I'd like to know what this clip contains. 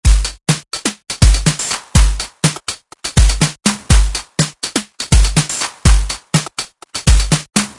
drum loop with hand claps made using demo of Manyetas Ritmo and DFX Geometer used in remix of Firethistime Indigenous Resistance 2003